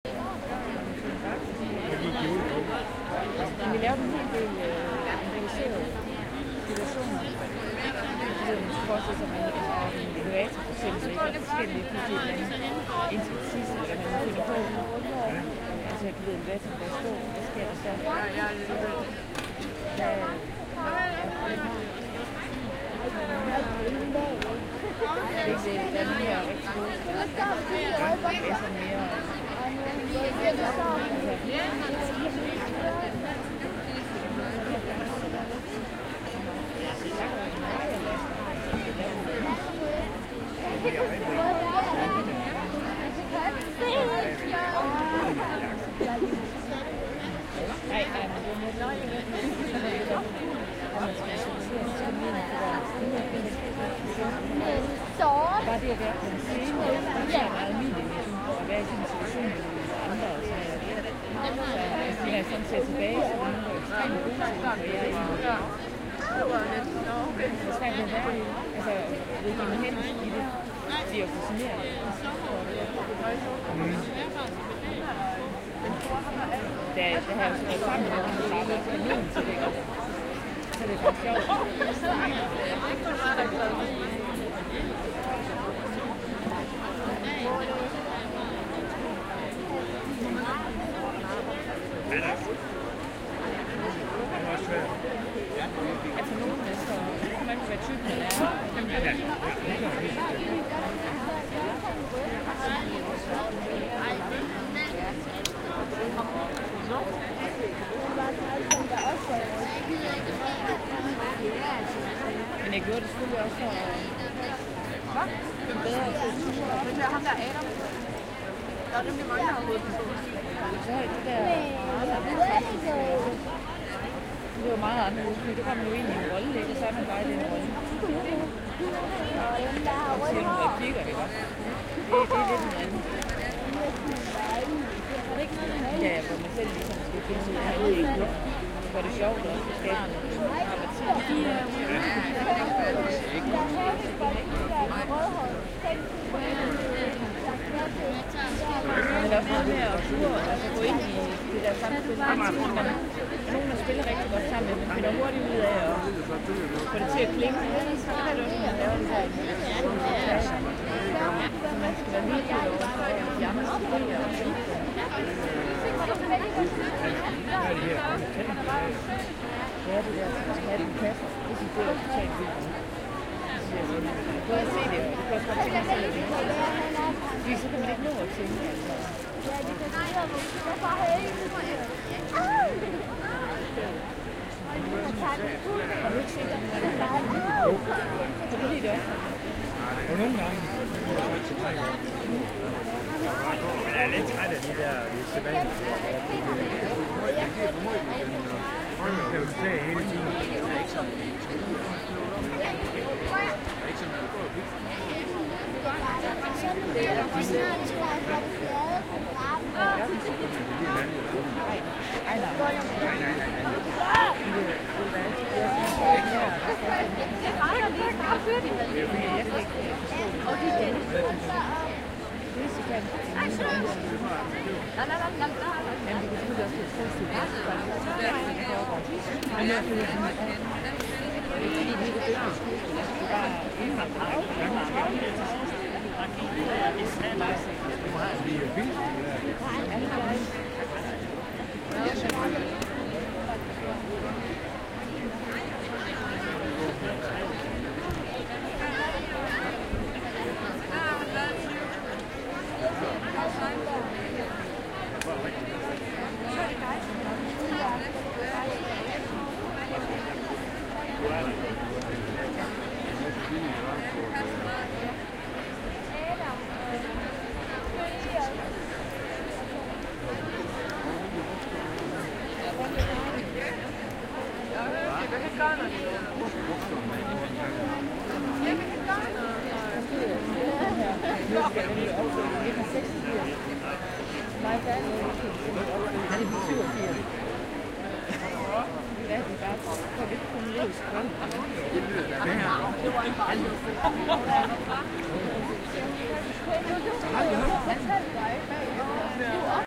big crowd chatter
A big crowd of people chatting away. Recorded with Sony HI-MD walkman MZ-NH1 minidisc recorder and a pair of binaural microphones.
chat, crowd, chatter, laughing, laughter, gathering, outdoor, laugh